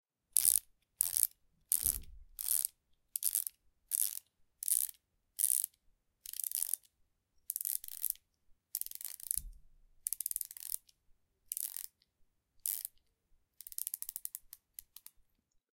Screwdriver, Ratchet, C
Raw audio of a ratchet screwdriver being twisted without the screw attached. I originally recorded this for use in a musical theatre piece.
An example of how you might credit is by putting this in the description/credits:
The sound was recorded using a "H1 Zoom recorder" on 23rd April 2017.
tool, twisting